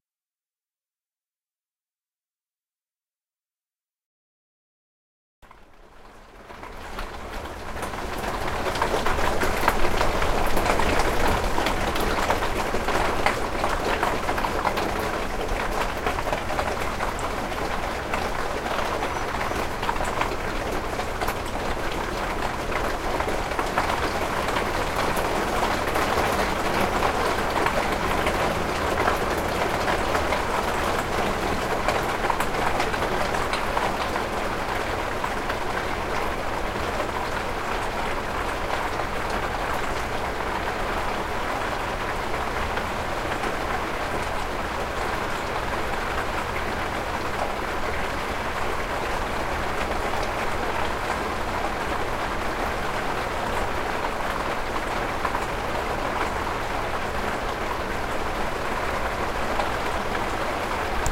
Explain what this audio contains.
Recording of small hail pellets outside my window. Recorded with a Sony PCM-D50 using the internal stereo mics.
Recorded in Vancouver, Canada.